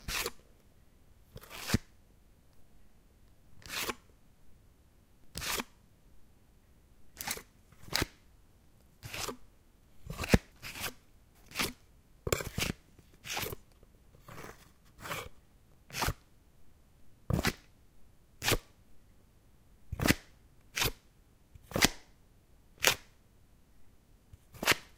Unsheathing and sheathing a knife.
Recorded with Zoom H2. Edited with Audacity.
sheathing, attack, danger, drawing, metal, en-garde, strap, draw, duel, knife